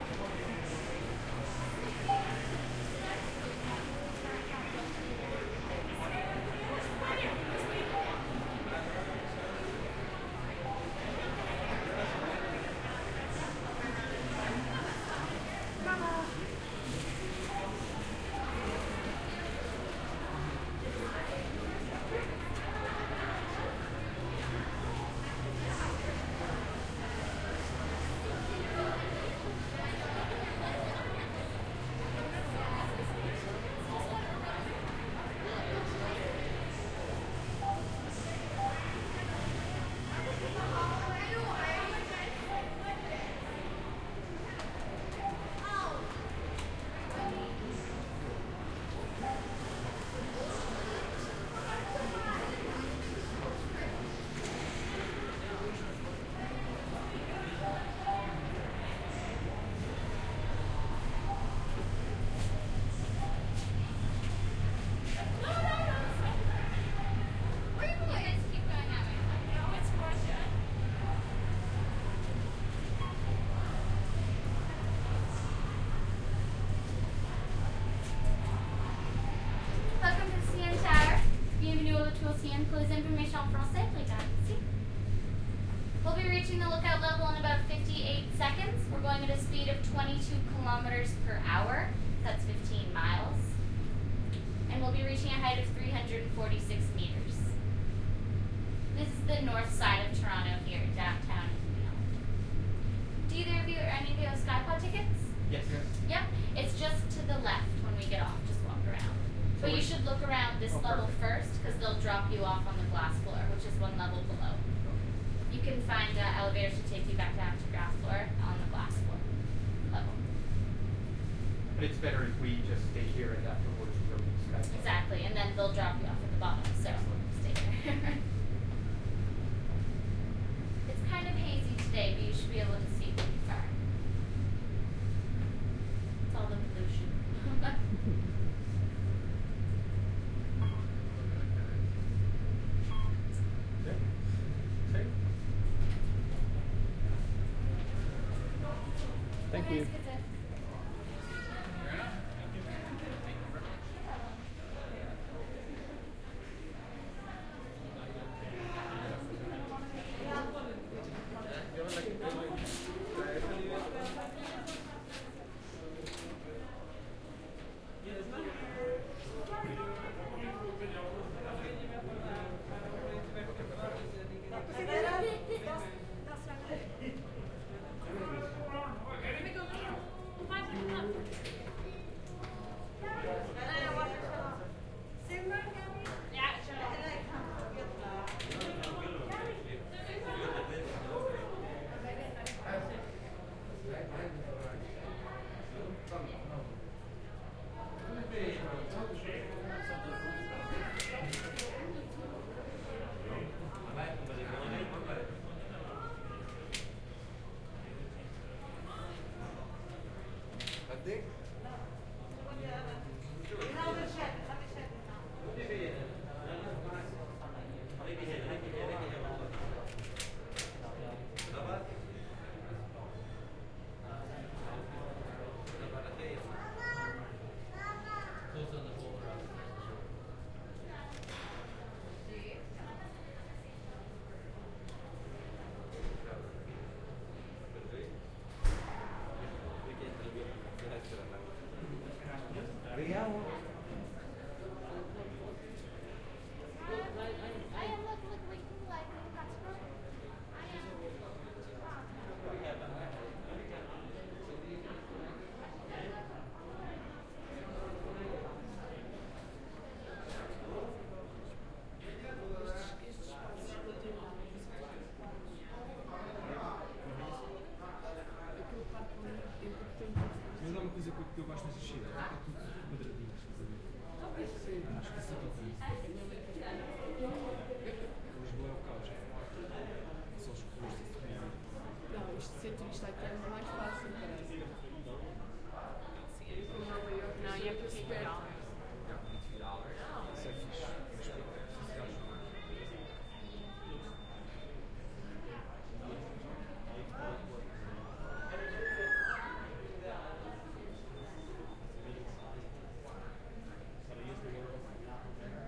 CN tower 01
Waiting for, and then riding the elevator to go to the viewing deck of the CN Tower. The tour guide describes various things and if you listen really carefully you can hear my ears pop (in-ear mics). Once the elevator ride is over, you can hear the small crowd in the observation area.Recorded with Sound Professional in-ear binaural mics into Zoom H4.
cn-tower, field-recording, geotagged, guide, indoors, inside, phonography, tour